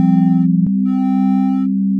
Digital Sound loop
New audio track
Generate > Tone (FA; 174,61 hz; 0,66666 second; sine; amplitude 1)
Gain = -12dB
New audio track
Generate > Tone (SOL; 196,00 hz; 2 seconds; sine; amplitude 1)
Effect > Tremolo
wetness 40 %
frequency 1,73 hz
Gain = -12dB
New audio track
Generate > Tone (DO; 261,63 hz; 2 seconds; sine; amplitude 1)
Effect > Phaser
Stages 2, Wet
in this order, take the values:
0,4
359
100
30
Gain = -15dB
Quick Mix
Normalize